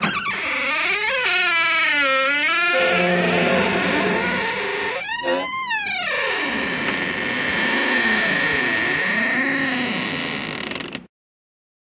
one of a selection of creaking door sounds.
door, fear, halloween, horror, squeak, suspense, terror